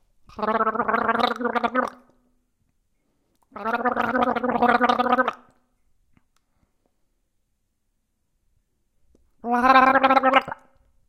Gargling with water. Sound Recorded using a Zoom H2. Audacity software used by normalize and introduce fade-in/fade-out in the sound.